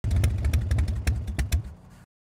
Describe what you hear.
Harley cut out
vehicle, car, automobile, ignition, engine, sports